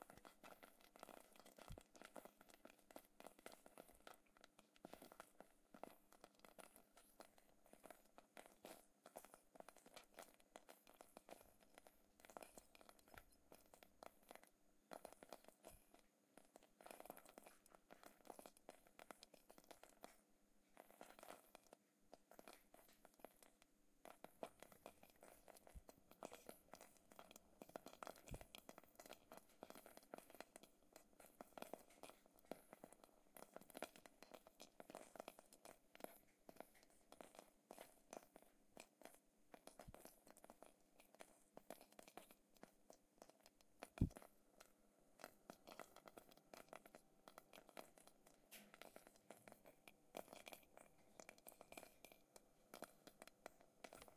cheese boiling
Cheese, au, boil, boiling, ebullition, fondu, fromage, saucepan